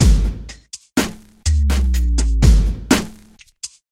Breaks Pushed Beat 05
big beat, dance, funk, breaks
funk, big, breaks, beat, dance